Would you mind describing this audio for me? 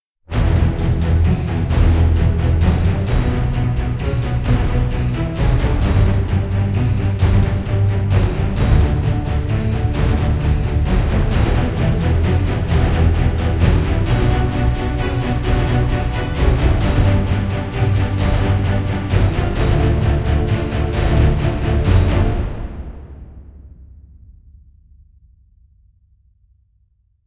Epic trailer action music
Epic trailer music short track for an action scene or trailer, inspired by Two Step From Hell, I made this music in my DAW with some orchestral libraries, mainly AudioImperia Nucleus and Metropolis Ark.
Hollywood, action, booming, cinematic, dramatic, drums, dynamic, epic, heroic, intense, movie, music, orchestral, powerful, soundtrack, strings, symhonic, toms, trailer